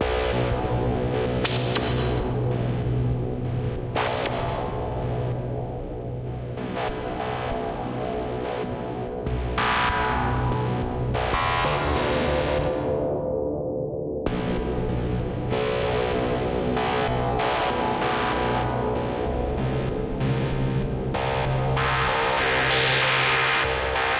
ambient 0001 1-Audio-Bunt 6
breakcore, bunt, NoizDumpster, synthesized, square-wave, tracker, drill, glitch, lesson, synth-percussion, harsh, ambient, lo-fi, digital, loop, VST, DNB, noise, space, electronic, rekombinacje